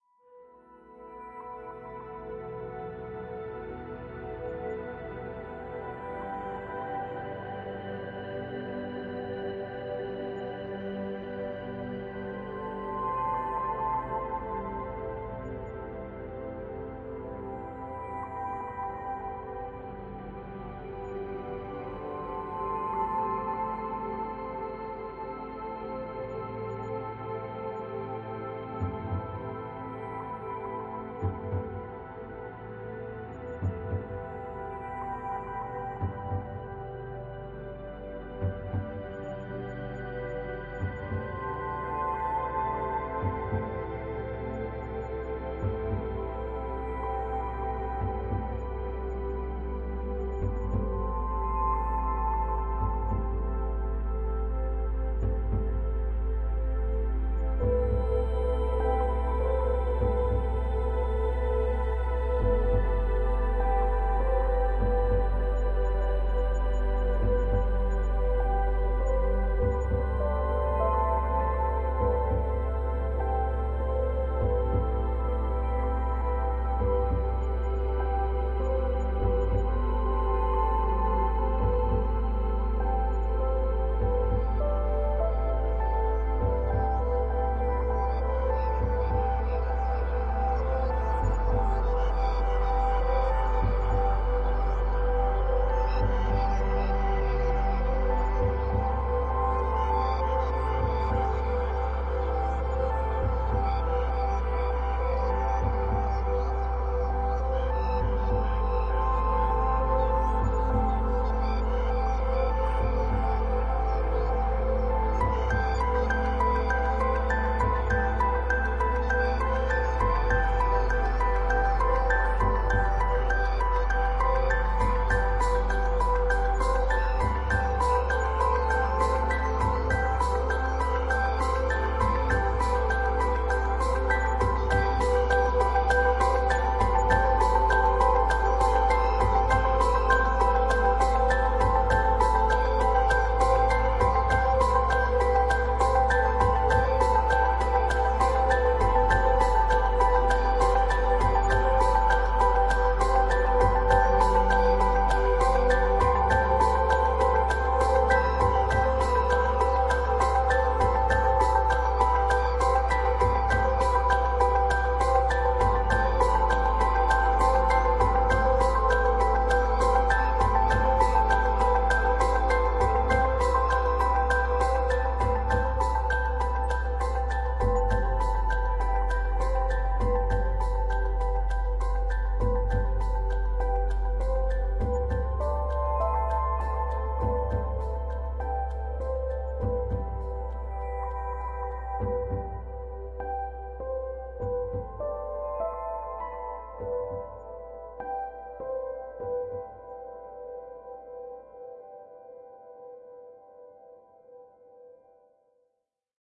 Listen track with nice and warm sound. Track features piano. Space pad. Plugins Omnisphere, Kontakt (Drums of War2), (Factory Library). Bpm 100.Ableton 9,
12 Midi Channels.
ambient, atmospheric, chill, chillout, classical, deep, downtempo, drone, electronic, emotional, experimental, instrumental, music, piano, relax, space